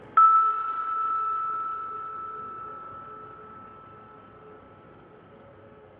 I couldn't find any real and free glockenspiel sounds,so I recorded my own on my Sonor G30 glockenspiel with my cell phone...then I manipulated the samples with Cubase.I hope you like them and do whatever you want with them!